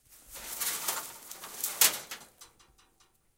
Roll of thick guage wire lifted and then dropped in dried grass, dried grass crunches, wire hits metal sheet.